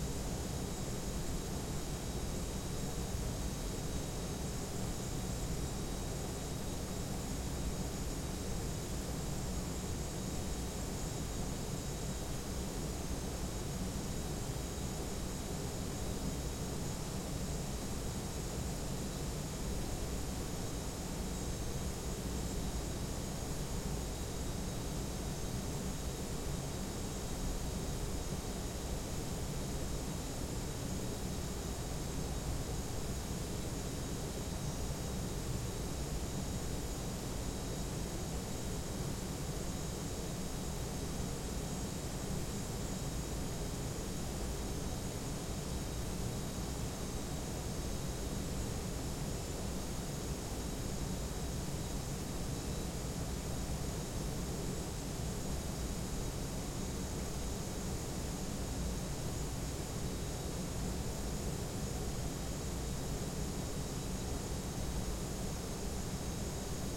Utility room rear
4ch-surround field recording of a technical utility room, featuring running computers, diverse machinery and a very noisy air-conditioning hub.
Very useful as a neutral backdrop for any kind of motion picture or radio play requiring an "techy" feel to the atmosphere, also good for science-fiction. The ambient noise of this room, I always think, is what being on the ISS must sound like.
Recorded with a Zoom H2, these are the REAR channels, mics set to 120° dispersion.
ambience, ambient, atmo, backdrop, drone, field-recording, gas, hiss, machine, neutral, noise, rooms, technical, ventilation